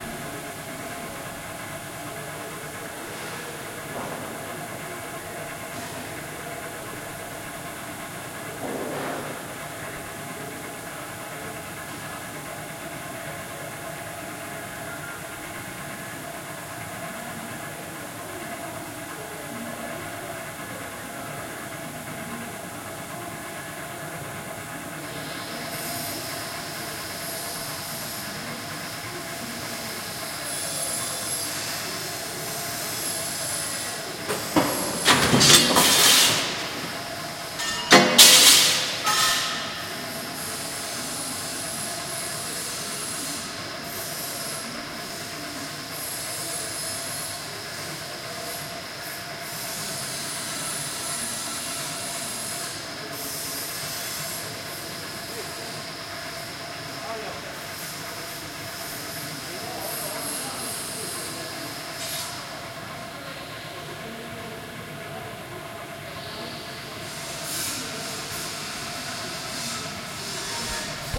Metal Workshop 3
Metal workshop in Pula, Croatia. Sounds of the Metal works ;)
Machinery, Hitting, hall, welding, Cutters, press, Slicers, Grinder, Mechanical, Workshop, Metal, MACHINE, Sounds, Cutting, GENERATOR, Operation